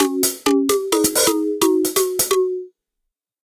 Klasky-Csupoesque Beat (130BPM 11 8) Pattern 010f (with Drums)
Short, single bar loops that use a unique tuning system (that I have described below). The end result of the tuning system, the timbre of the instrument, and the odd time signature (11/8) resulted in a sort of Rugrats-esque vibe. I named the pack based on the creators of Rugrats (Klasky-Csupo). The music has a similar sound, but it's definitely it's own entity.
There are sixteen basic progressions without drums and each particular pattern has subvariants with varying drum patterns.
What was used:
FL Studio 21
VST: Sytrus "Ethnic Hit"
FPC: Jayce Lewis Direct In
Tuning System: Dwarf Scale 11 <3>
Instead, the scale used is actually just-intoned (JI) meaning that simple ratios are used in lieu of using various roots of some interval (in the case of 12 tone temperament, each step is equal to the twelfth root of 2, then you take that number and you multiply that value by the frequency of a given note and it generates the next note above it).